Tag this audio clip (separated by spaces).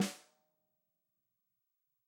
acoustic drum dry instrument multi real snare stereo velocity